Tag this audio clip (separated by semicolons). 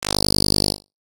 5; Ace; Ambiance; atmosphere; dj; effect; electronic; electronica; Erokia; fruity; live; Loop; music; musicbox; note; pack; Piano; Random; Recording; sample; samples; sound; stab; stabs; this; three